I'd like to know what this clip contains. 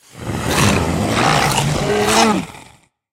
A monster voice sound to be used in horror games. Useful for all kind of medium sized monsters and other evil creatures.
terrifying, frightening, indiedev, game, gamedev, scary, indiegamedev, fear, voice, videogames, sfx, games, video-game, fantasy, horror, rpg, gamedeveloping, epic, monster